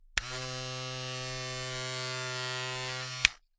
Electric razor 9 - travel razor short
A recording of an electric razor (see title for specific type of razor).
Recorded on july 19th 2018 with a RØDE NT2-A.
razorblade, beard, hygiene, shaven, shaver, Razor, shave, shaving, electric, electricrazor